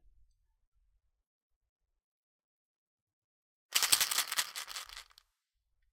Shaking Pill Bottle
A prescription pill bottle being shaken. Recorded on Blue Snowball for The Super Legit Podcast.